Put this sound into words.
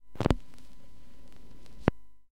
Vinyl Record, On Off, C
Raw audio of placing the needle of a record player onto vinyl, then taking it off a second later.
An example of how you might credit is by putting this in the description/credits:
The sound was recorded using the "EZ Vinyl/Tape Converter" software on 24th March 2018.
lift, needle, off, On, player, record, turntable, vinyl